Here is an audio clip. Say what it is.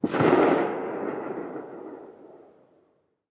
Distant Gunshot
I was out recording some sounds late one evening, and I randomly heard this, which I can assume is a gunshot.
Not much processing was done to this sound, except some noise removal and pitch shift.
(As long as you don't blatantly steal credit, of course.)
warfare, outdoors, distant, fire, live-fire, firework, bang, battle, shoot, rifle, shooting, military, explosion, army, fireworks, projectile, gun, weapon, war, firing, echo, outside, explosive, shot